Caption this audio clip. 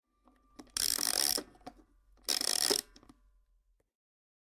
Winding Noise - Music Box
Two turns of the winding mechanism of a music box.
Box, mechanism, Music, winding